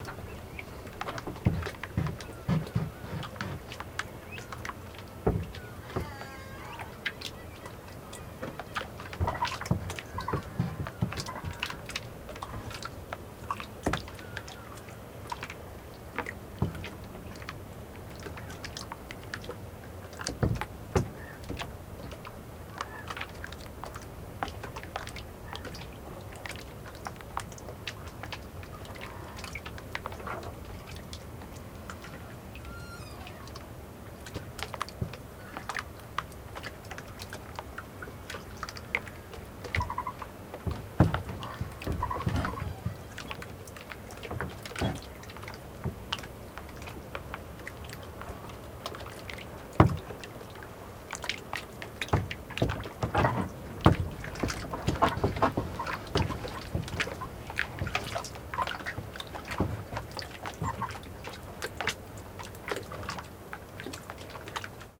BARCO MUELLE OMAN
A small boat being rocked gently by small waves, at a dock in the Omani coast of Dhofar. (Mono 48-24; Rode NTG-2 Shotgun Mic/Marantz PMD Portable Recorder.)
boat, dock, magoproduction, oman